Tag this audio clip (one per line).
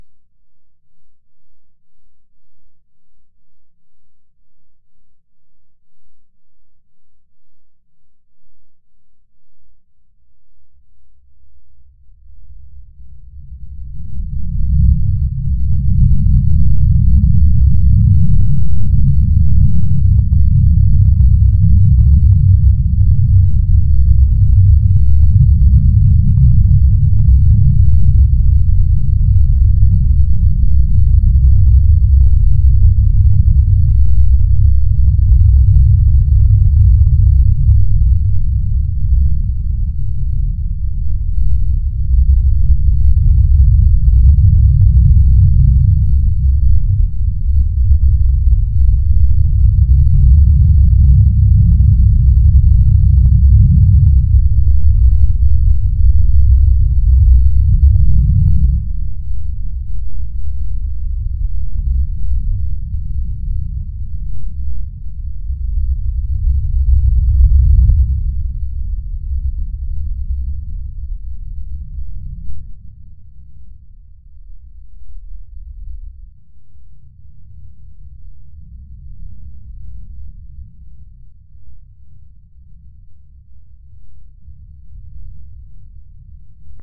experimental
mix
noise
soundeffect